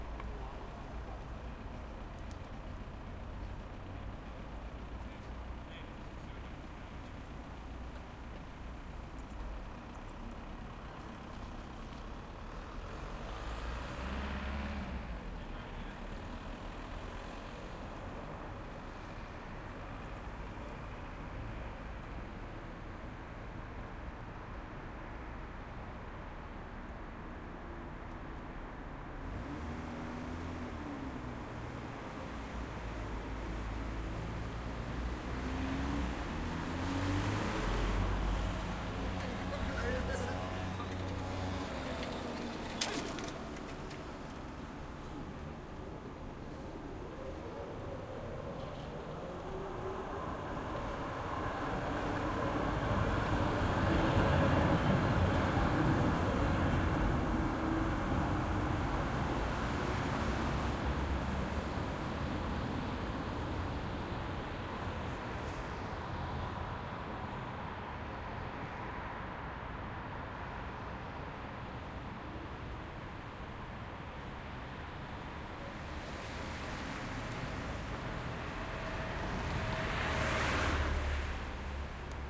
Tram passing intersection dry XY120
Field recording of a tram passing through an intersection with light traffic and pedestrians.Recorded on a Zoom H4n using on-board microphones in xy120 degree configuration.
intersection, passing, traffic, tram, field-recording